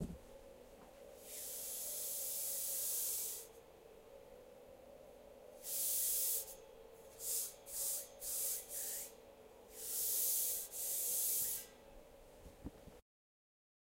Sliding my hand on a metal rail